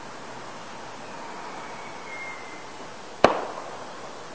Short clip of one rocket whistling up and exploding.
For general details see Fireworks1 in this pack.
boom, bang, ambience, fireworks-night, guy-fawkes-night, firework